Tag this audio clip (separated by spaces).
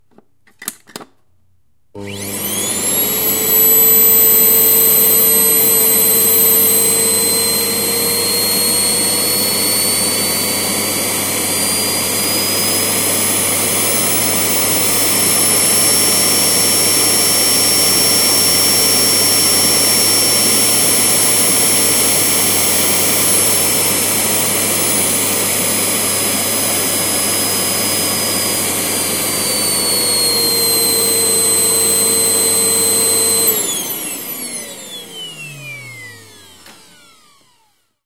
cleaner Progress Stuttgart type Vacuum